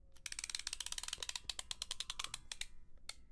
06 - Mecanismo sube
Sound of a mechanism in operation, or a crank or some sort of lever being pulled.
mechanism, pull, lever, crank